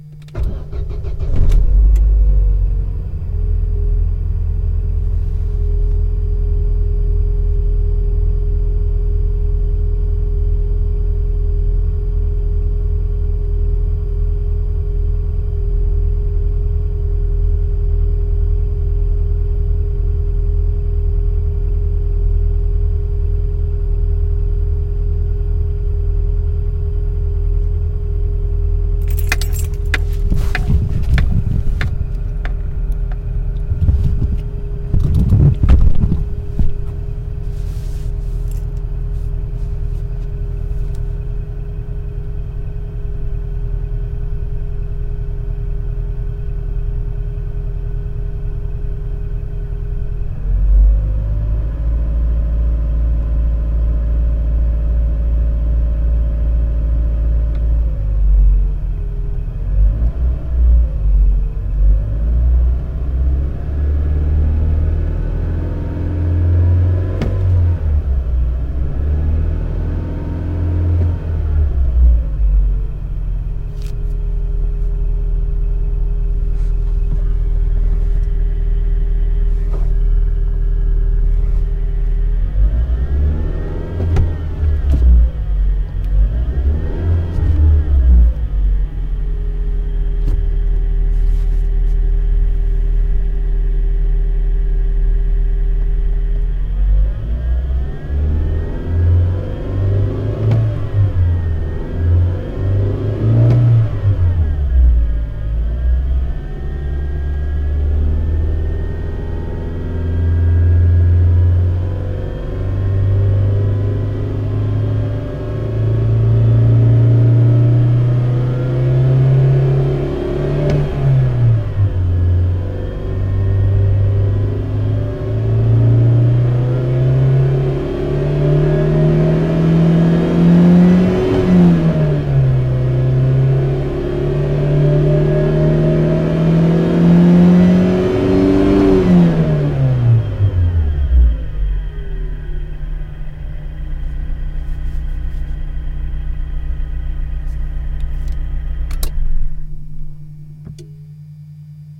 Turning on the motor and roaring in neutral gear, car stopped.
motor, car, idle, acceleration, neutral, accelerating